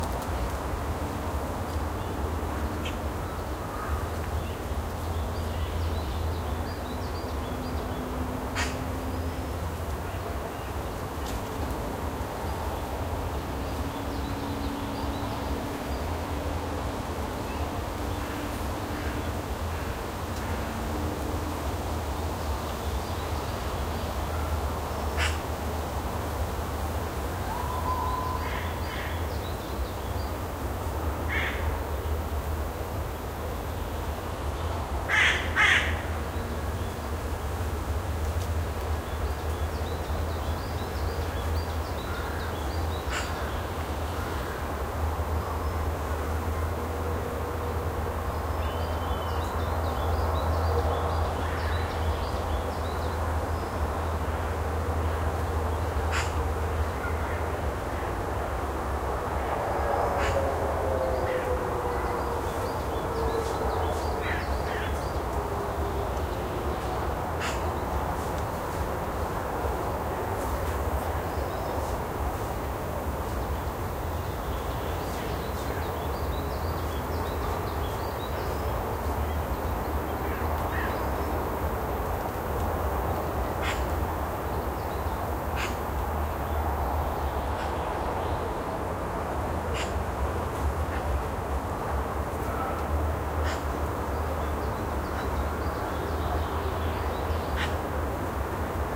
Omsk Victory park 9
Athmosphere in the Victory park, Russia, Omsk. Deep in the park, forest. Cawing of crows. Weak noise of cars from highway.
XY-stereo.
Mic: Blue Yeti Pro.